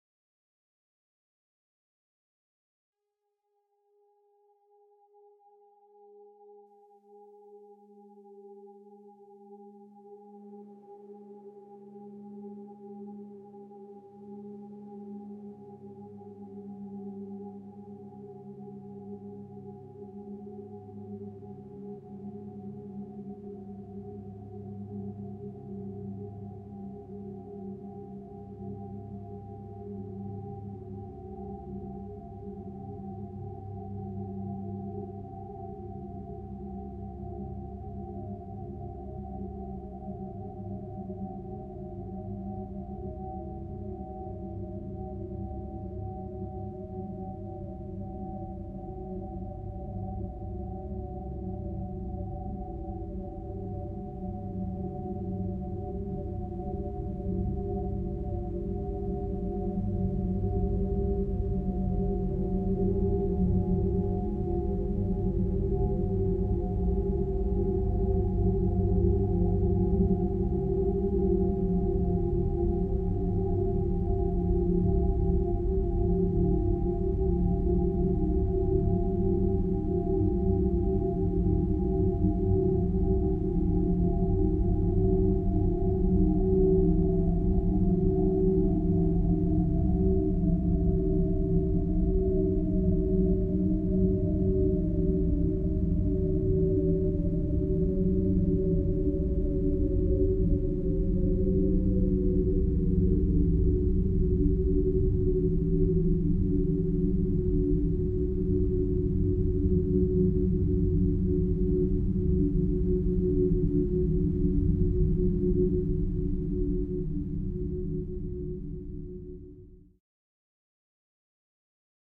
ioscbank in stack exp
atmosphere, atmospheric, clustering, dark, drones, falling-pitch, high, oscillator-bank, processed, stack